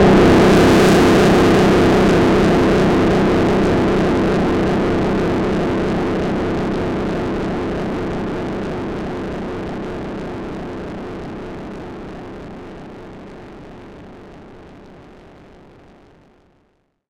Long analog bang
Banging bass-noise with long decay, made with Waldorf Pulse routed through a Sherman Filterbank.